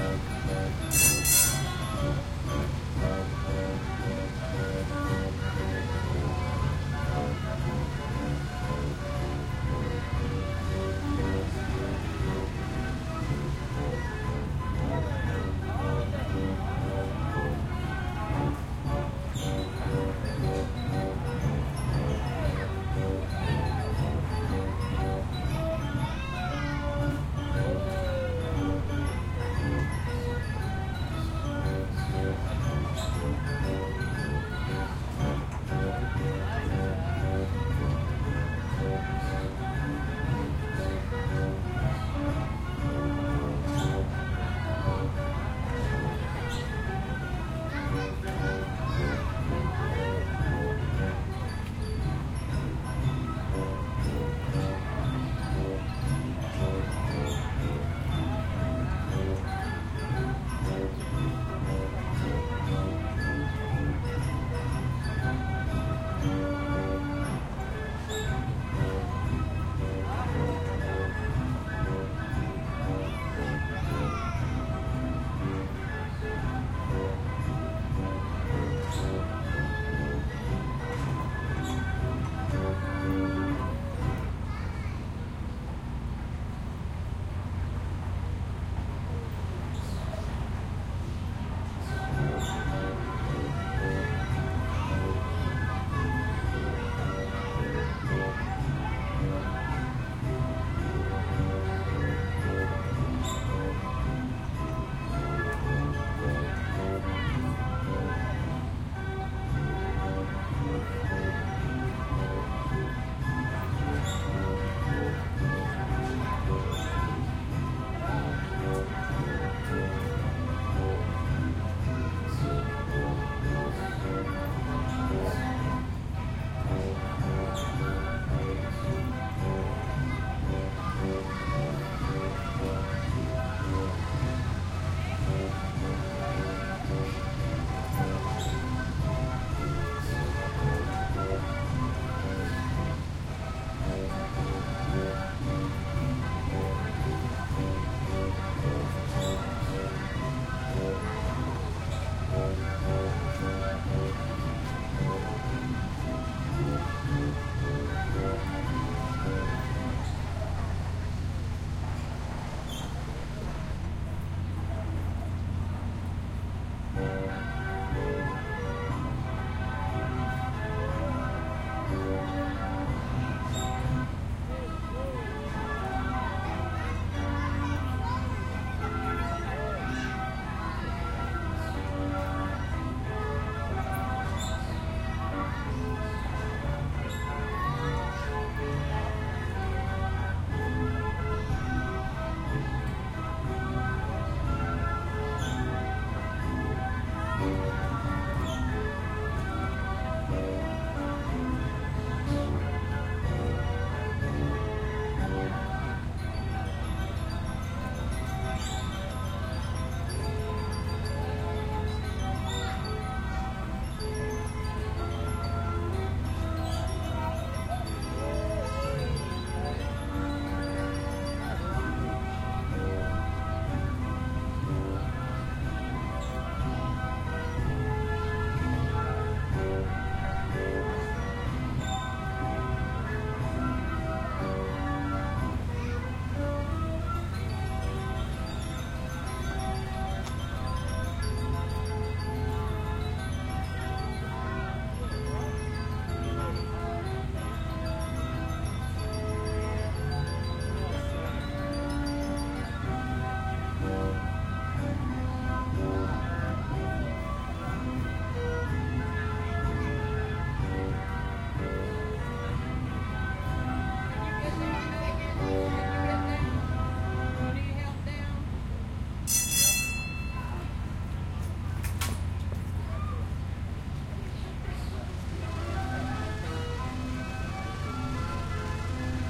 Recording of the carousel at the National Mall in DC. Recorded with a Zoom H4n.